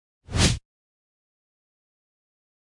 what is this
Woosh - Short & Quick
air, attack, fighting, luft, punch, swash, swhish, swing, swish, swoosh, swosh, whip, whoosh, wind, wisch, wish, woosh